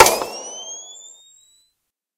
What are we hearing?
this is made from a record of a old camera flash and some percussions jointed and added modular synthesis
badland, flash, futuristic, machine, spotlight